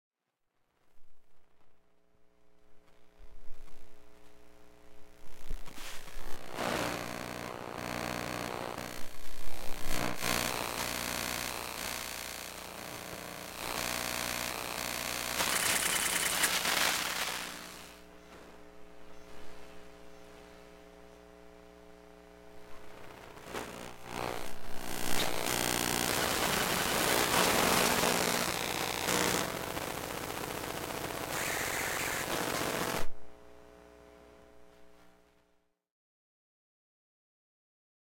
Iphone 7 Electromagnetic Sounds 02
Iphone 7 electromagnetic sounds
LOM Elektrosluch 3+ EM mic